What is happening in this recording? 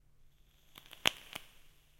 firing vape
Firing a Voopoo Drag Mod with a Vandy Vape Pyro tank on it. Short fire
Dragged, Vandy, Voopoo, OWI, Vaporizor, Drag, vape